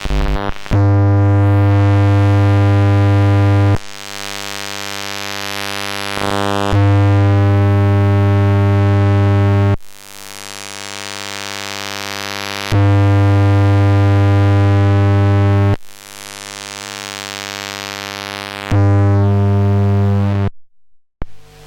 EM magnetic valve01
electromagnetic scan of a magnetic valve opening and closing. sounds a lot like synthesized sound.
electromagnetc, electronic, pulse, scan, synthesizer, valve